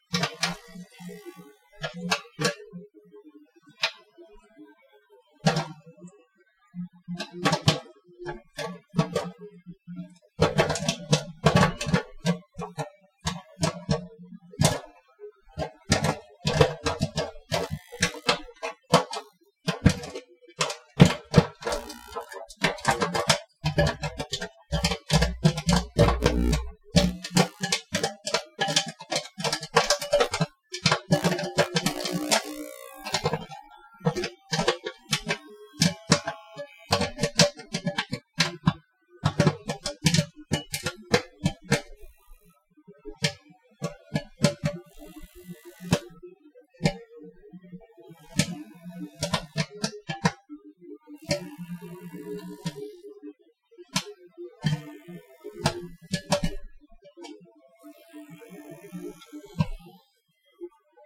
here's some popcorn popping in a microwave. for reasons I cannot control, there is background noise.